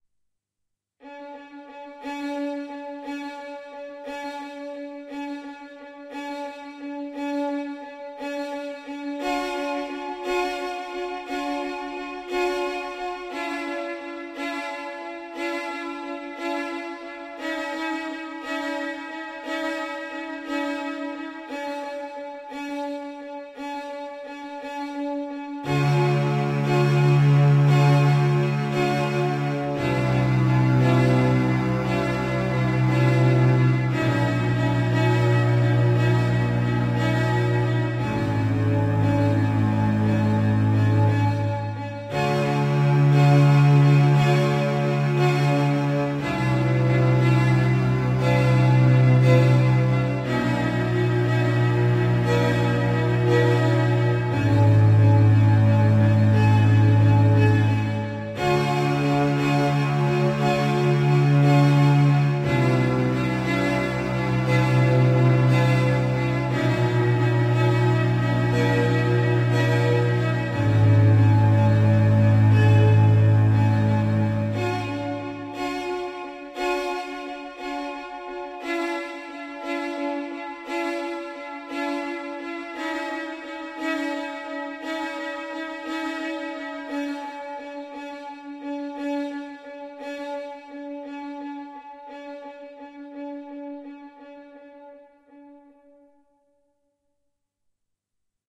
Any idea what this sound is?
Movie like strings combined for a clasic feeling. Created with a synthesizer, recorded with MagiX, edited with MAgiX studio and audacity.